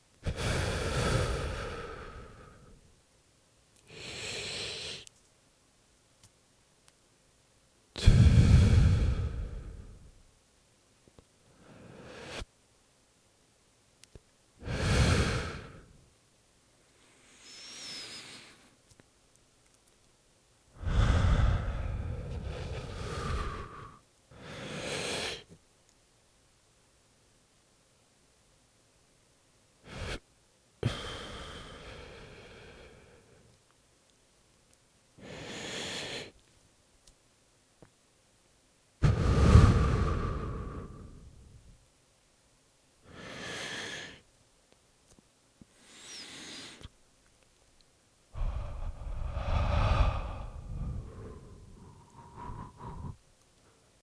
Breathe in and out of a male smoker
cigarette, breath, proximity, cig, man